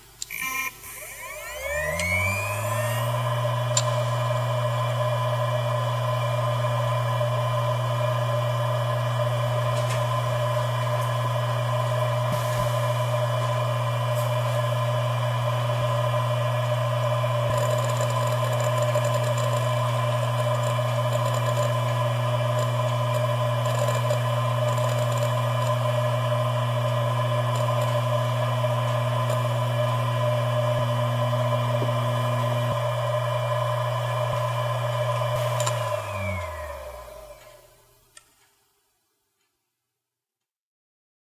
Seagate Barracuda 7200.9 - Fast Spinup - FDB
A Seagate hard drive manufactured in 2006 close up; spin up, writing, spin down.
This drive has 1 platter.
(ST3802110A)
disk, hdd, motor, rattle